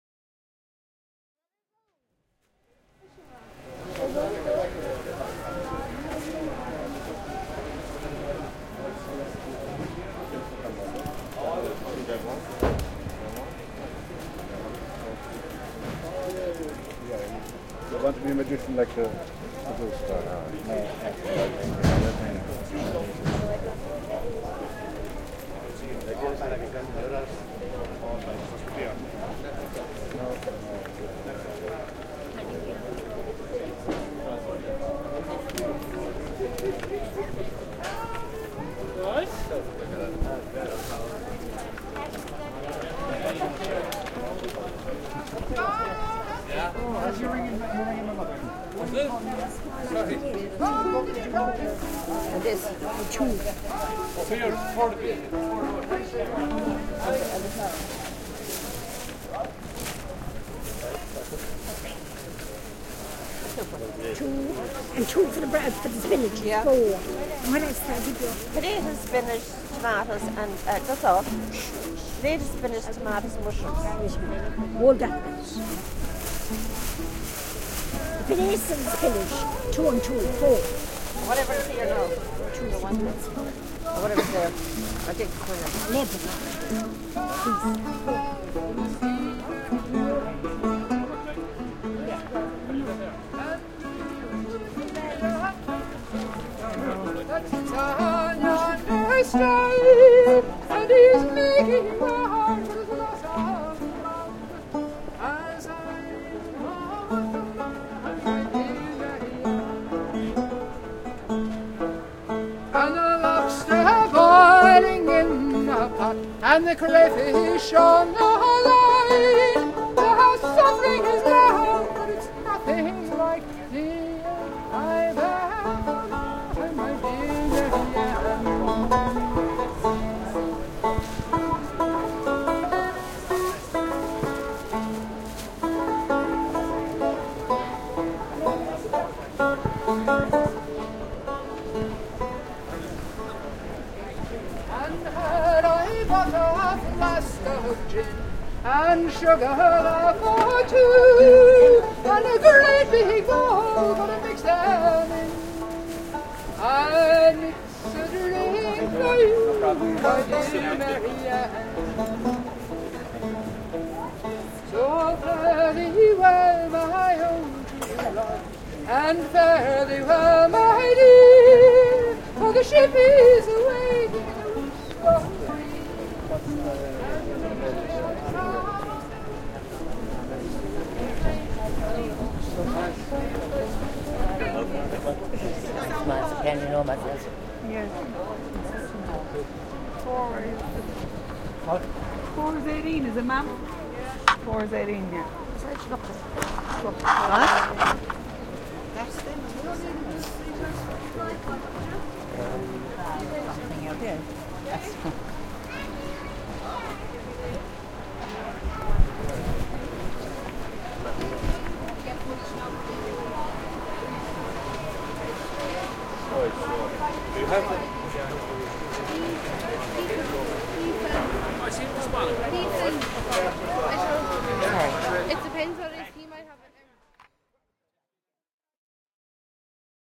We take a walk through Limerick's Milk Market. People sell and buy. We hear a lovely lady busking from 1.00 to 3.00.
Recorded with Sony PCM-D50.